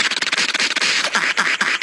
stem beatbox 3b
rhythmic voice, with massive amounts of compression, gating, equalizing etc
voice, industrial